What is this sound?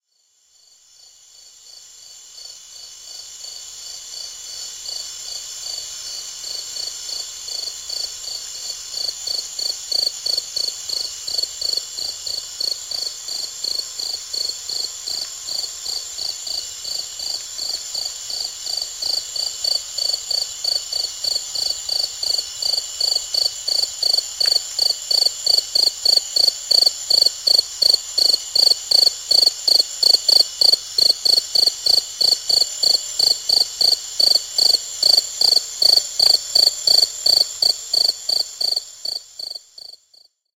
Cricket Uxmal
Cricket at night, sitting on the garden stairs of our hotel in Uxmal, Mexico.
It
has been recorded first from a certain distance, then from very close,
so that one can hear the scratching sound of the wings rubbed at each
other to produce the typical sound.
cricket, insect, field-recording